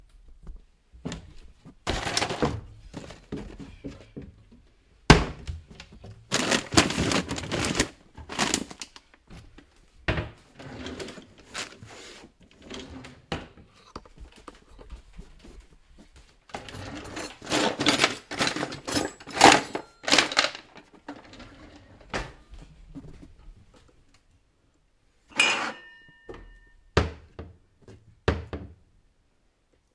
Quickly going through wooden kitchen cabinets